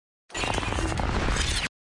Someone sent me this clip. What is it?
Rubber Crunch whoosh no punch
Dry sound effect that goes from rubber to crunchy metal. No deep whoosh and no reverb.
crunch, rubber, whoosh